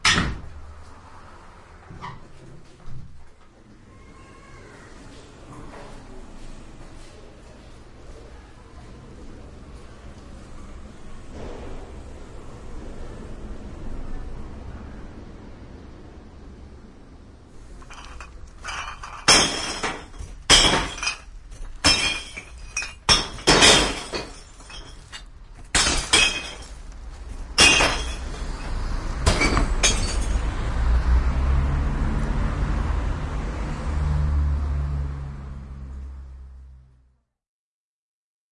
cm glass
Binaural recording made at a glass recycling bank in South London, Autumn 2005. Home-made stealth binaural mic/headphones, Sony MZ-R37 Mini-Disc recorder.